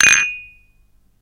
Small tea cup quickly placed on a table and rattling as a result.
Recorded in a basement book and document storage room. Recording hardware: LG laptop, Edirol FA66 interface, Shure SM57 microphone; software: Audacity (free audio editor).